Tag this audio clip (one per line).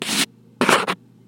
sample
scrape